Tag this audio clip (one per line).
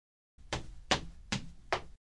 madera
pasos
zapatos